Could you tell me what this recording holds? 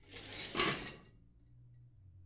pans banging around in a kitchen
recorded on 10 September 2009 using a Zoom H4 recorder